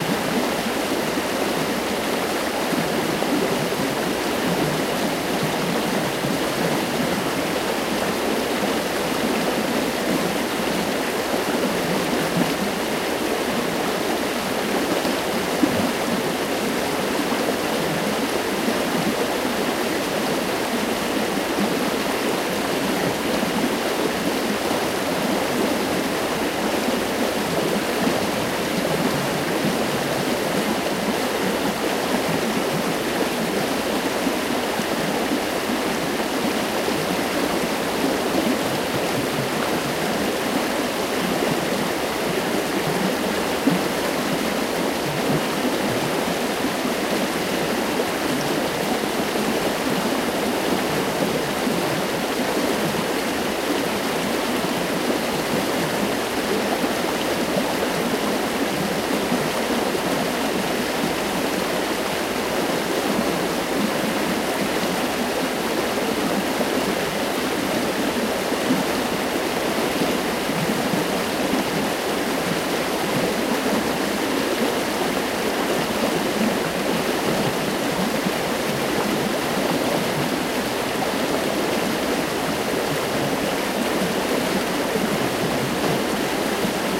Streem, creek, close (3 of 3)
creek, flow, flowing, liquid, nature, river, streem, water